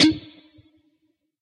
A pick slide scrape down the strings but as fast as a strum.